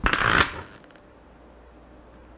0034 Bag Drop
Recordings of the Alexander Wang luxury handbag called the Rocco. Bag drop on the hardwood floor